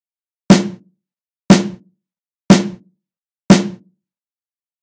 O Punchy Snare
I took a snare hit from a song I recorded on and tweaked the gate, reverb and eq. Do with it what you will.
punchy-snare, vintage-snare